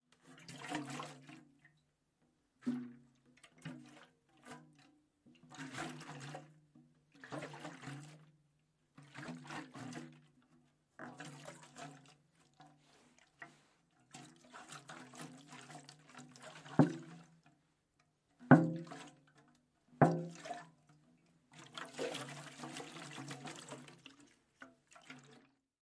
Recoreded with Zoom H6 XY Mic. Edited in Pro Tools.
A gas canister is being shaken and hit a few times.